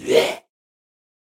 Creature-Beast-Retch
Me + AKG C1000S + Reason 6.5
A little original sound effect ideal for a post production/game project. Uploaded dry version to allow for more fun and tinkering!